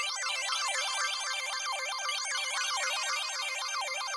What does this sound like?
A few keyboard thingies. All my stuff loops fine, but the players here tend to not play them correctly.